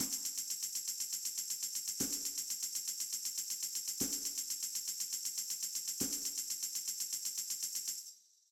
Happy Trance - Tambourine - 120bpm
Part of the Happy Trance pack ~ 120 Bpm
These is an original, rhythmatic, catchy, synthesized tambourine shaker, ready to be looped & built upon